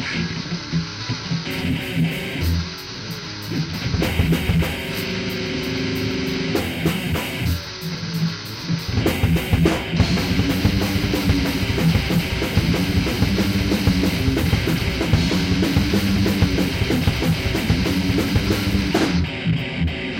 Metal Band Jam 1
2 electric guitarists and one drummer jam metal and hardcore.
Recorded with Sony TCD D10 PRO II & 2 x Sennheiser MD21U.